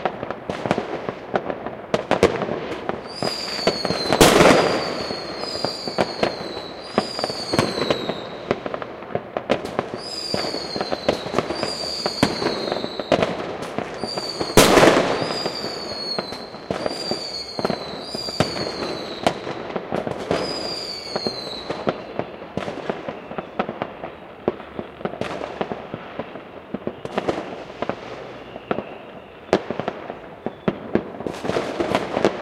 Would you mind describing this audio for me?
Recorded with the zoom recording device on New Year's Eve 01.01.2019 in Hessen / Germany. A firework in a small town.
movie, Rockets, film, cannon, suspense, atmosphere, horror, ambient, bangers, guns, soundscape, boom, dramatic, dark, ambience, shot, drone, background, firework, strikes, hollywood, blasts, mood, background-sound, New-Years-Eve, cinematic